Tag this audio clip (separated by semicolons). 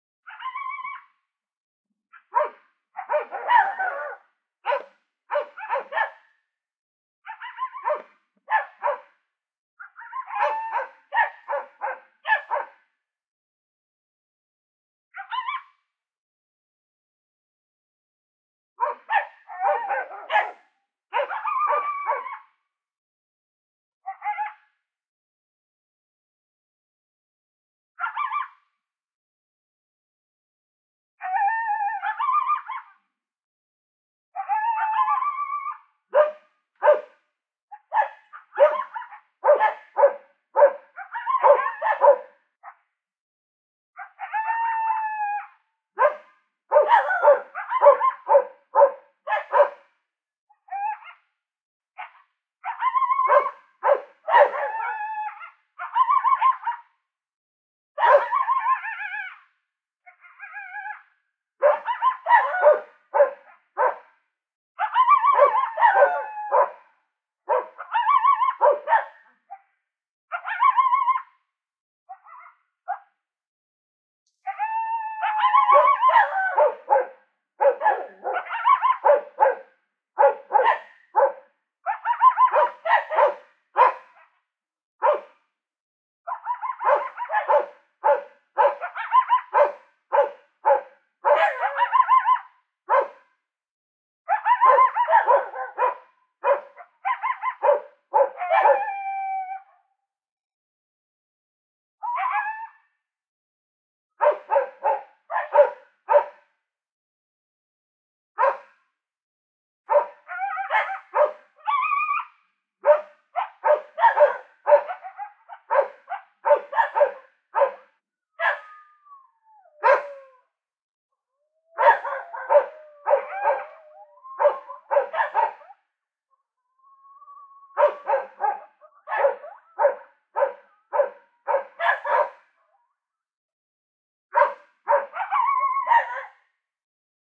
annoying; barking-dog; canine; coyote; desert-night-sounds; field-recording; fight; loopable